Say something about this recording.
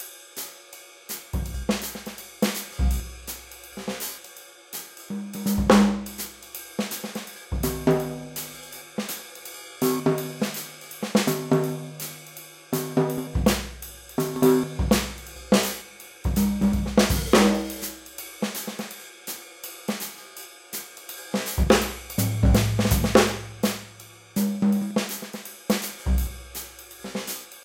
palo alto
165-82,5 bpm
fl studio and addictive drums vsti
beat, downtempo, jazz, jazzbeat, loop, nu